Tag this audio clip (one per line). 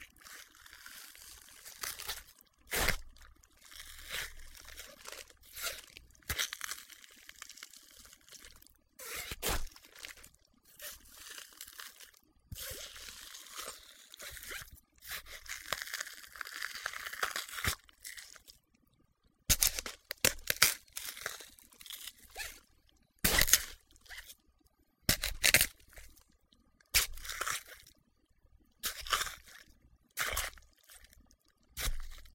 watermelon
gore
kill
horror
knife
stab